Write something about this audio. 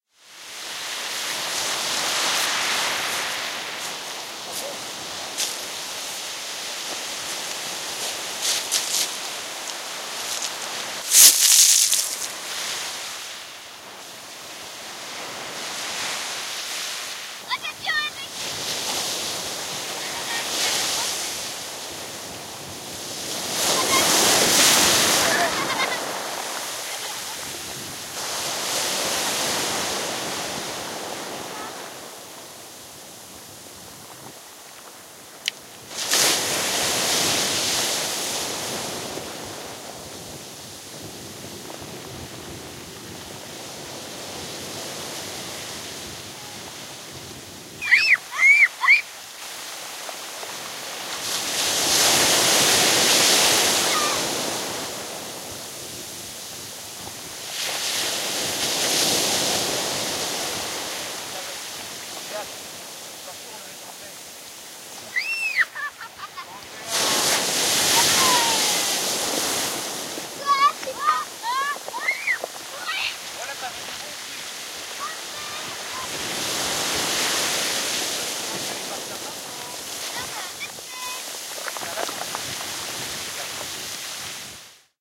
recording on Re Island, in Western France, on a windy day with LEM DO-21B Mic and MZ-N710 MD; wawes, stones, and children playing in background
island, sea, waves, wind